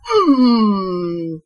lol i record my voice while playing video games now so that i can save certain things i say, you know, for REAL reactions to use for cartoons and stuff. a lot of my voice clips are from playing games with jumpscares, and that's where my screams and OOOOOOOHs come from. i used to scream ALL the time when playing jumpscare games, but now it's turned into some weird growl thing or somethin, i dunno. so yeah, lots of clips. there are tons of clips that i'm not uploading though. they are exclusively mine!
and for those using my sounds, i am so thrilled XD
woman, english, jump, exclamation, startled, scared, talk, speak, female, scare, voice